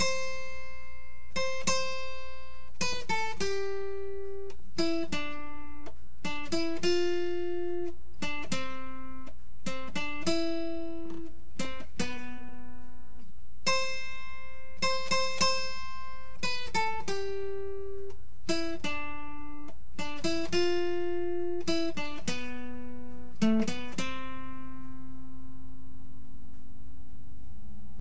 acoustic guitar playing a riff.
guitar, acoustic, sound